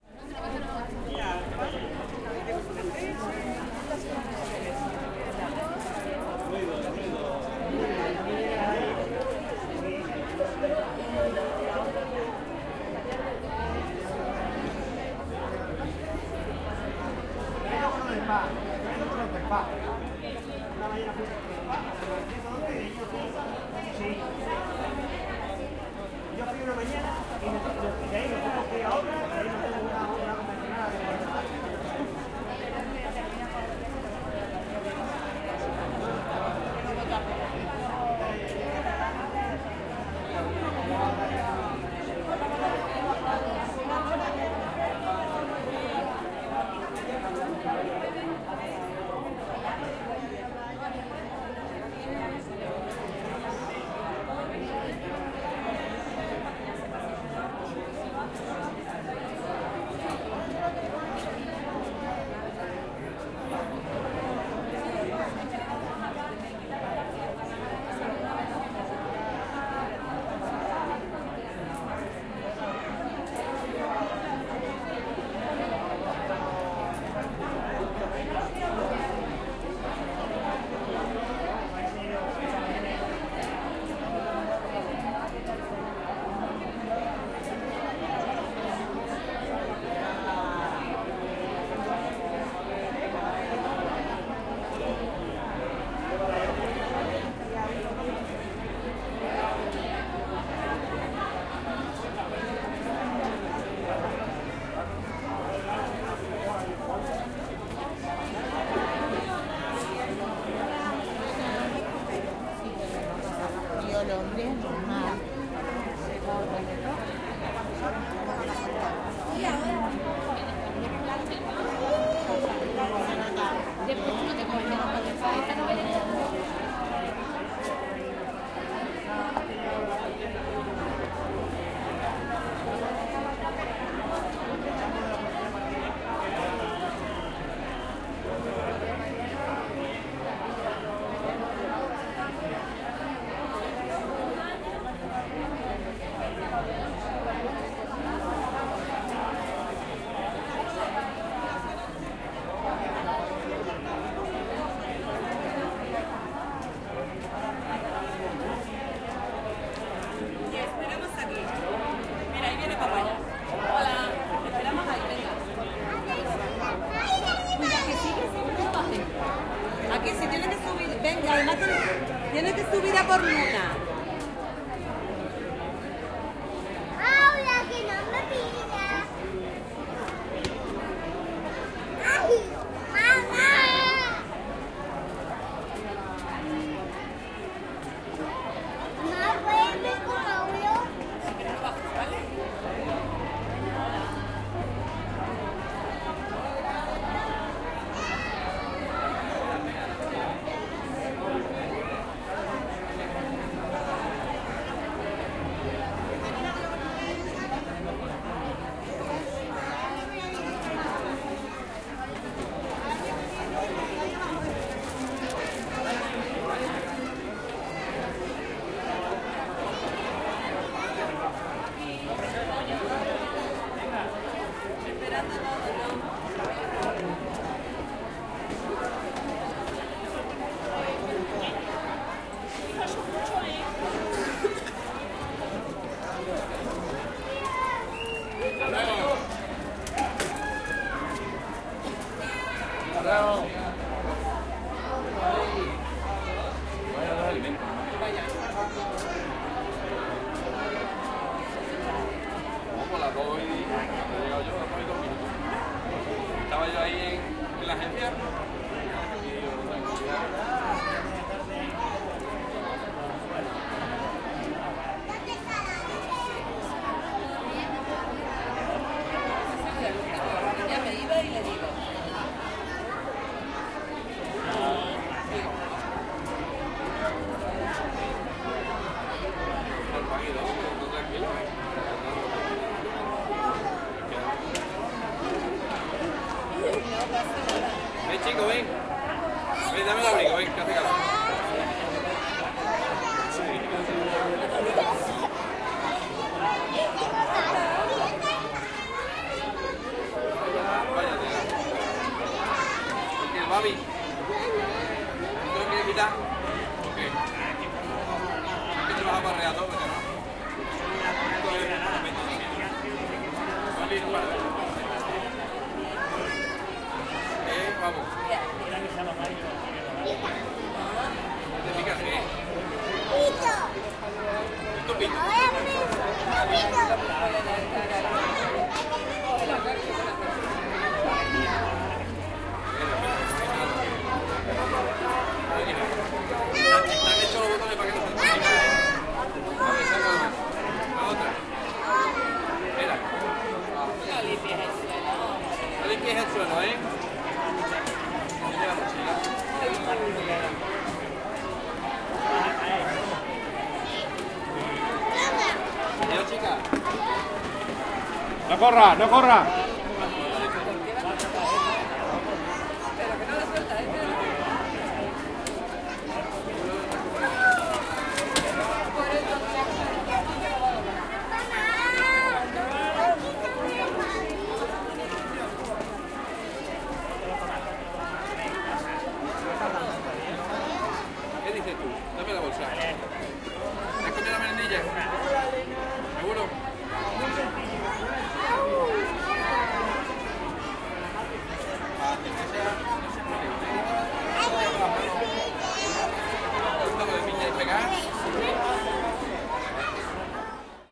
Parents waiting their kids in the door of the school, talking in Spanish and walking. Kids, children talking and laughing.
20120326